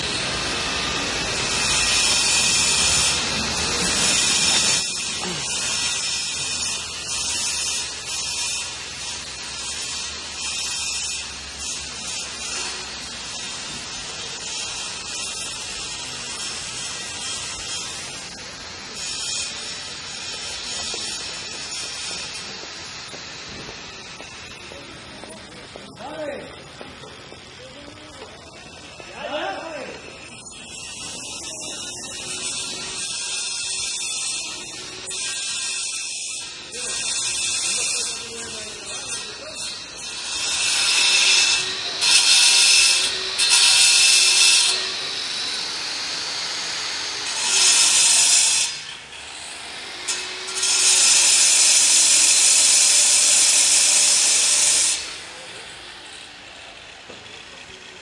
Construction noises at Plaza de la Encarnacion, Seville. Recorded during the filming of the documentary 'El caracol y el laberinto' (The Snail and the labyrinth) by Minimal Films. Sennheiser MKH 60 + MKH 30 into Shure FP24, Olympus LS10 recorder. Decoded to Mid Side stereo with free Voxengo VST plugin.